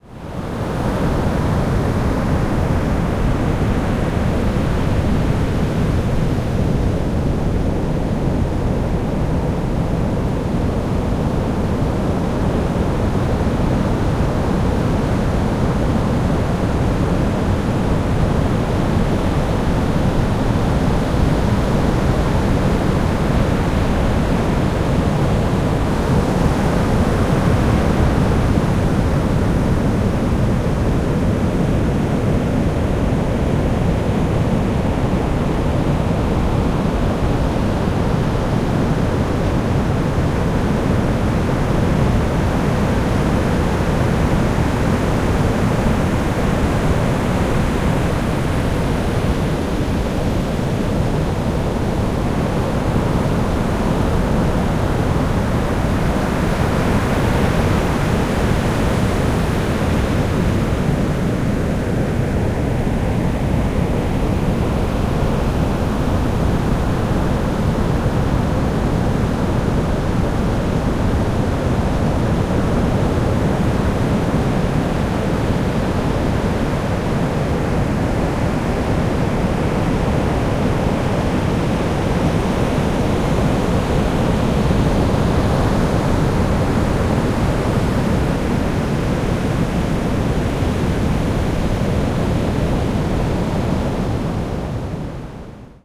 Big waves at a beach on the Atlantic Ocean
Big waves at Playa de Cofete in the very south of Fuerteventura. Recorded with an Olympus LS-14.
Fuerteventura, Ocean, waves, Canaries, field-recording, beach, island, Atlantic, water